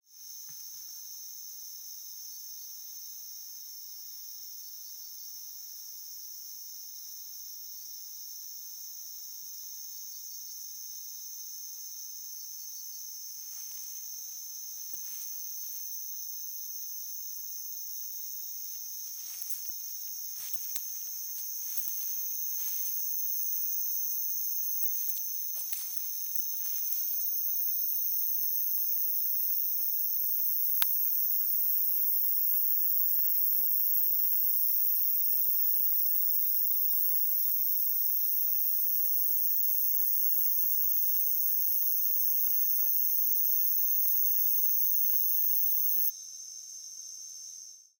Recorded early September 2016, midnight, Kashiwa, Japan. Equipment: Zoom H2N on MS stereo mode.